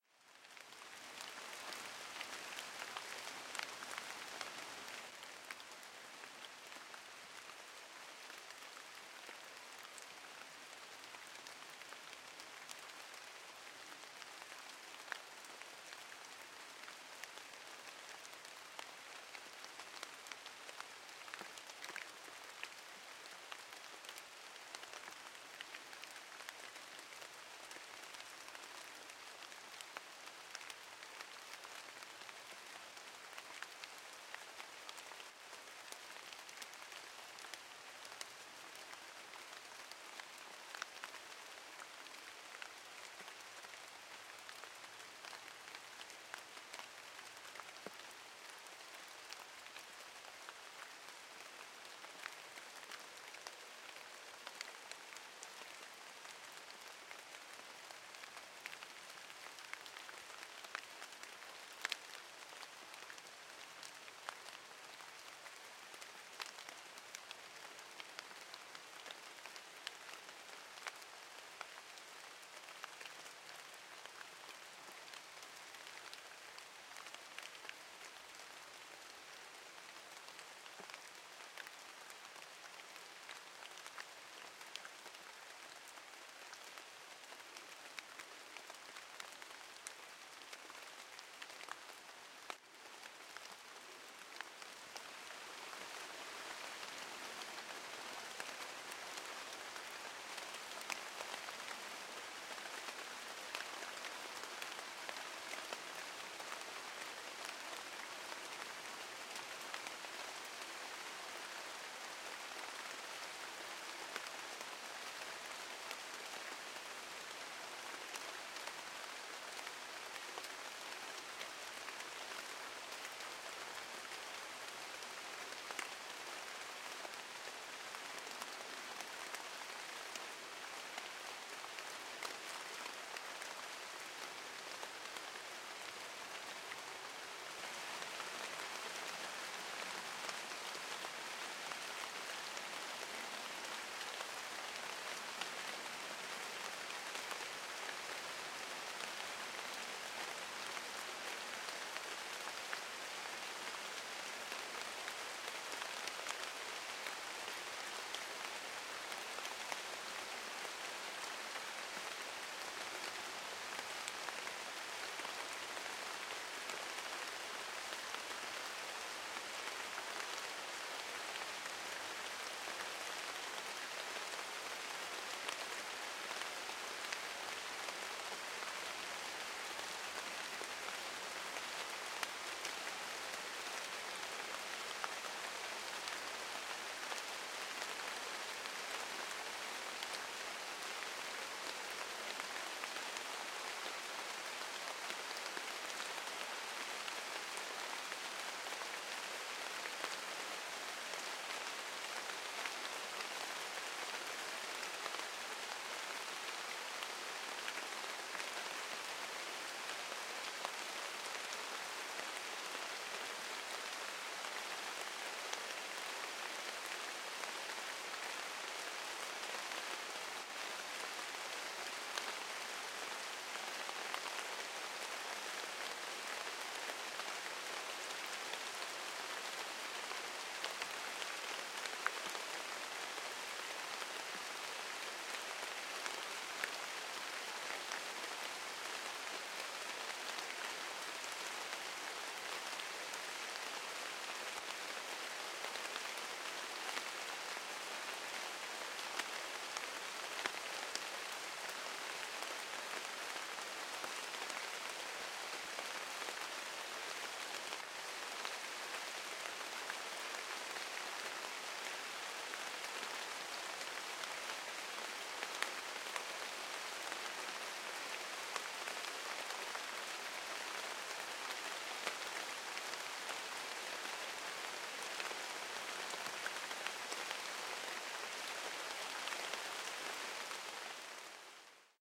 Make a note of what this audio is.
Woodland Rain
Rain falling in Norsey Wood, Essex, UK (a local nature reserve). Recorded with a Sennheiser K6/ME66 mic with Rycote windshield. The mic was attached to a Zoom H5.
Some editing with Audacity to remove clicks produced when raindrops hit the windshield.
field-recording, rain, weather